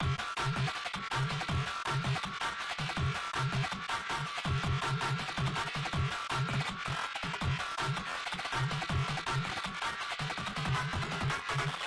processed acidized loop
dnb, idm, processed